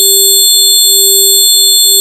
Generated from an FM-based software sound generator I wrote. Great for use with a sample player or in looping software.
16-bit, electronic, fm, hifi, loop, mono, sample, synth, two-second